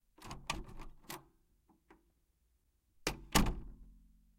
Door opening and closing 2
Wooden door being opened then shut.
door, opening, doors, open, wooden, closing, close, wood